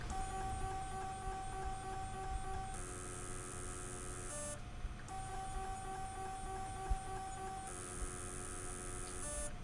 A Western Digital drive refusing to mount.
Recorded on a Sennheiser ME66 K6 microphone, in camera on a Sony NEX FS700.
Hum in the background is the air-conditioned office.
– hello! You're under no obligation, but I'd love to hear where you've used it.